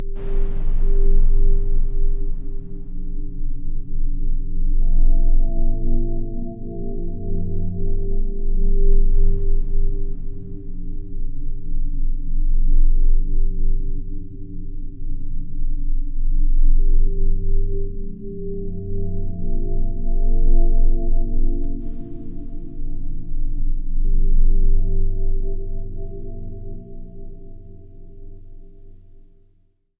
THE REAL VIRUS 06 - BELL DRONE - E0
Drone bell sound. Ambient landscape. All done on my Virus TI. Sequencing done within Cubase 5, audio editing within Wavelab 6.